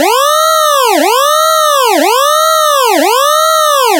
Sirena Futurista Lenta 3
Sirena Futurista Lenta / Slow futuristic siren loop